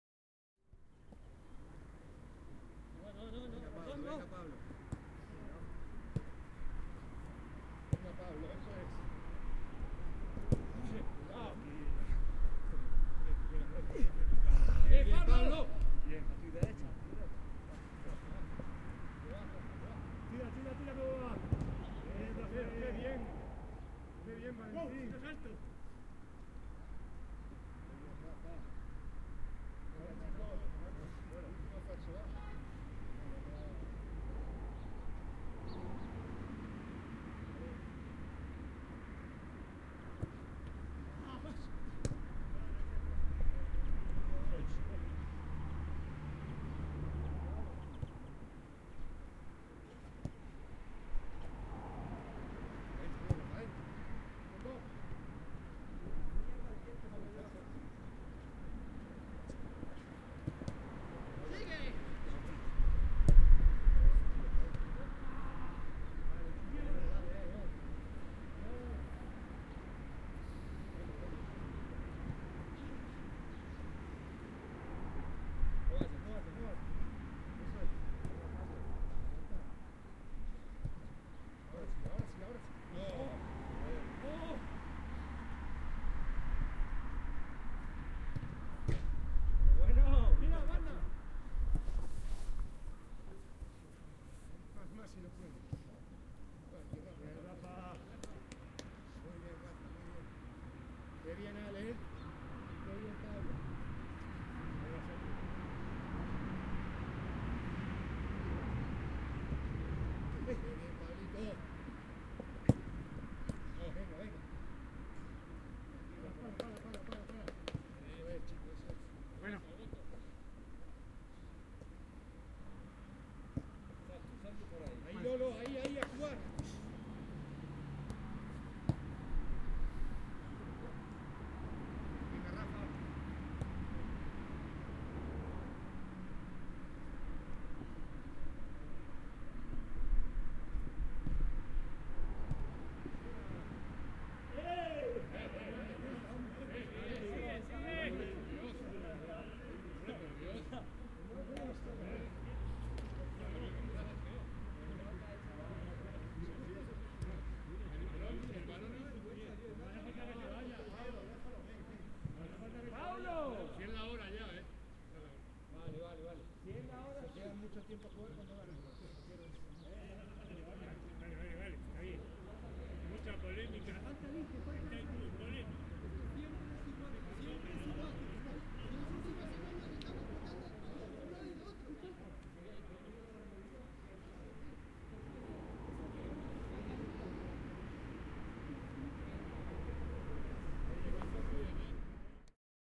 Entrenamiento Futbol Adultos Coches al Fondo